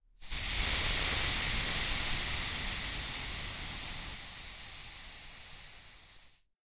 Sound of tire puncture. Sound recorded with a ZOOM H4N Pro.
Son d’une crevaison d’un pneu. Son enregistré avec un ZOOM H4N Pro.
bike, cycle, air, puncture, bicycle, cycling, wheel, inner-tube